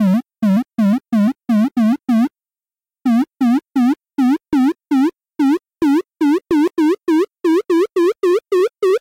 Going up
Bip sounds to show something rising.
Made for a short film: